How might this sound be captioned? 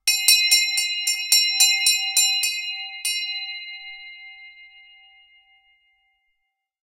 Recording of a hand bell being rung. Good for old house pull-type bell etc
old-door-bell, school-bell, hand-bell